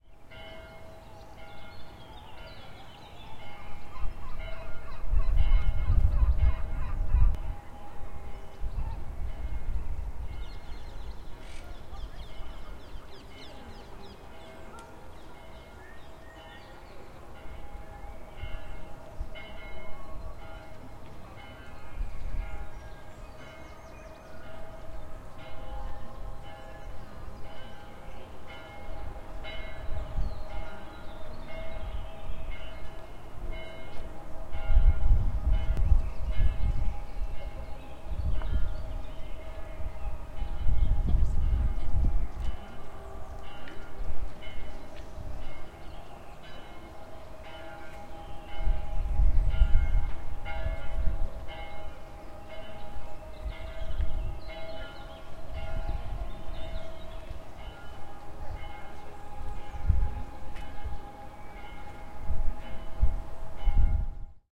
Palatino with background music
Inside the Palatino’s gardens we had a relaxed walking where we could find nice views and sounds. This one with a background choir music comin’ from the city.
Dentro de los jardines del Palatino nos relajamos paseando y encontramos bonitas vistas y sonidos. El que aquí escuchamos tiene un coro musical de fondo que llega desde la ciudad.
Recorder: TASCAM DR40
Internal mics
Ambiente, Background, Birds, City, dr40, Field-recording, Garden, handheld-recorder, Jardines, Landscape, Music, Palatino, Roma, Rome, tascam, travel